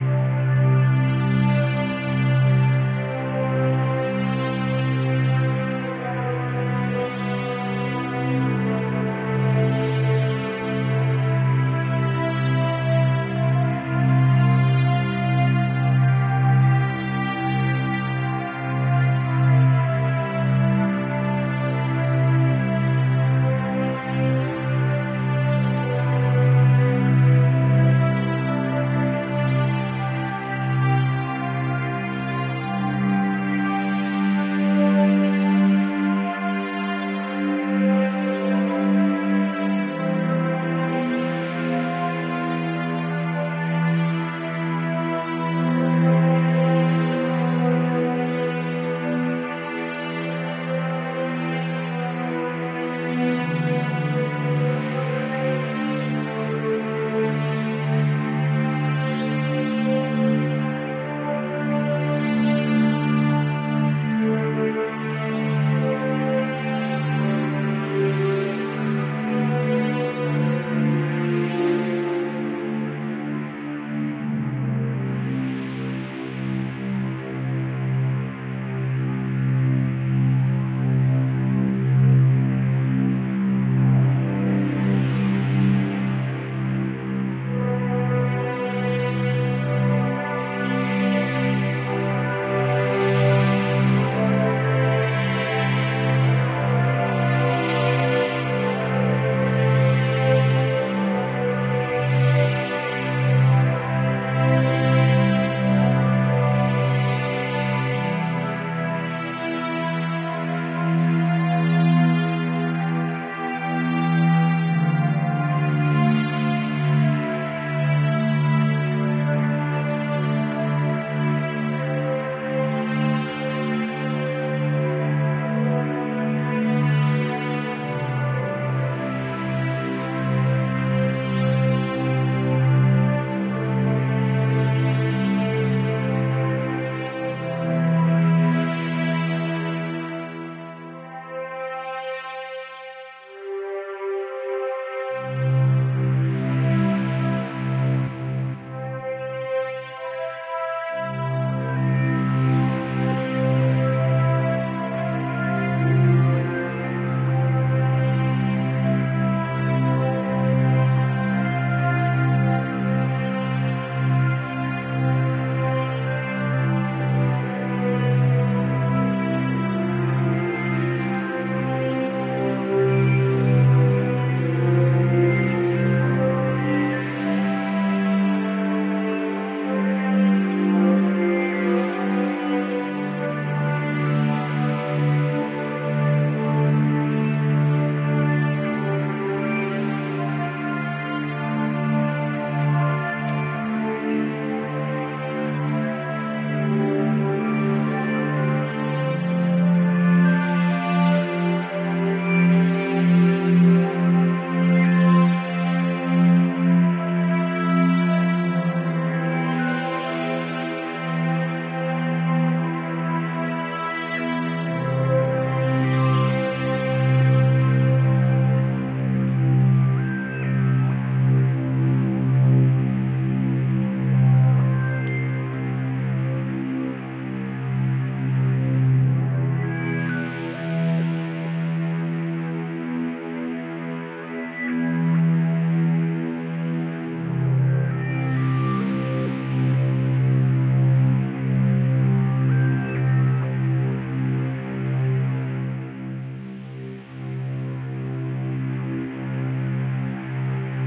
ambiance loop 2
ambiance, ambient, atmosphere, calm